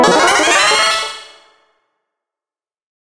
Retro Game Sounds SFX 159
shooting, gun, sfx, gameaudio, freaky, sounddesign